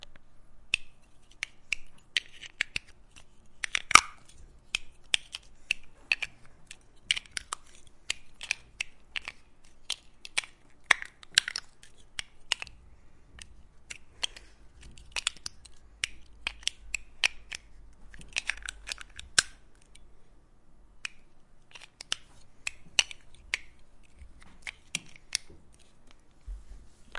mySound Sint-Laurens Belgium Blokjes
Sounds from objects that are beloved to the participant pupils at the Sint-Laurens school, Sint-Kruis-Winkel, Belgium. The source of the sounds has to be guessed.
Belgium, Blokjes, mySound, Sint-Kruis-Winkel